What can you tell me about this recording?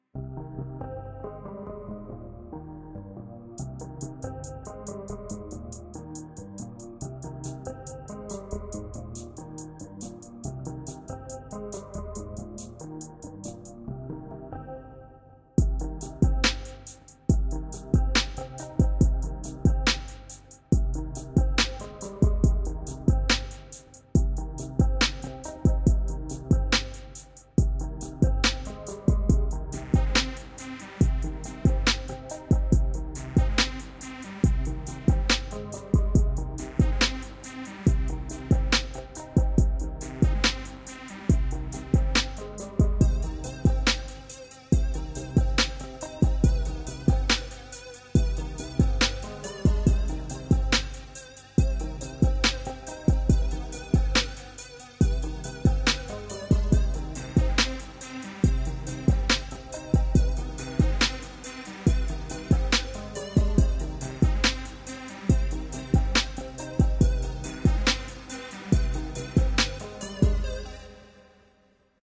Hip hop beat made in FL Studio with stock sounds.
Produced and written by NolyaW
blitz, gulp, hip, hop, instrumental, NolyaW, rap